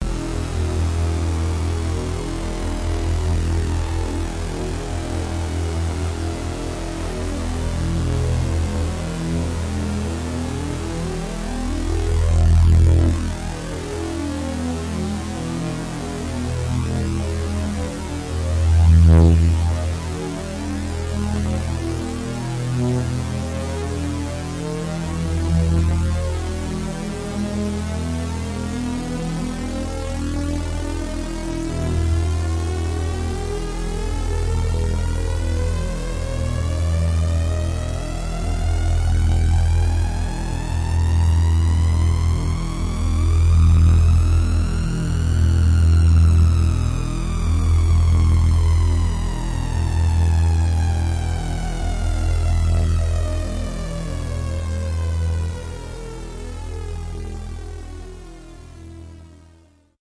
Q Saw LFO-ed filter sweep - E1
This is a saw wave sound from my Q Rack hardware synth with a low frequency filter modulation imposed on it. Since the frequency of the LFO is quite low, I had to create long samples to get a bit more than one complete cycle of the LFO. The sound is on the key in the name of the file. It is part of the "Q multi 004: saw LFO-ed filter sweep" sample pack.
electronic, saw